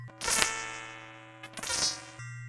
Alien computer
manipulated sounds of a fisher price xylophone
alien, computer, sci-fi, space, spaceship